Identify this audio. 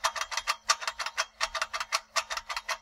GELIS Hugo 2013 2014 son1
PRODUCTION
Recording: a spinning turnstile
Audacity post-prod
Reduce noise, reduction 24dB, sensibility 0dB, smooth 150Hz, attack and delay duration 0.15s
Equalize, silence all 100Hz to 400Hz frequencies
TYPOLOGIE
Itération complexe
MORPHOLOGIE
Masse : Groupe nodal
Timbre harmonique : Pauvre
Grain : Rugueux
Allure : Stable
Dynamique : Attaque abrupte
Profil mélodique : Aucun
Site : Scalaire
Calibre : Filtré
click, field-recording, iteration, mechanical, mechanics, metal, metallic, rhythmic, turnstile